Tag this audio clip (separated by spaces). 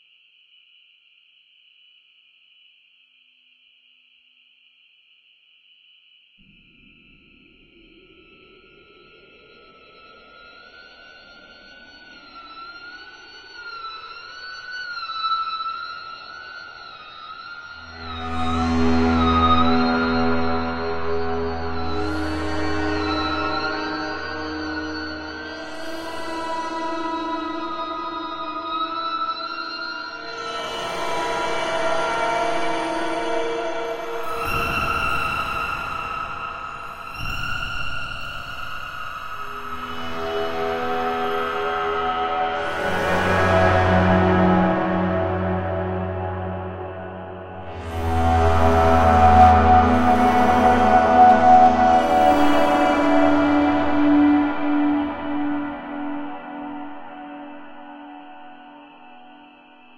horror insects swarm creature thriller free creepy shining soundtrack monster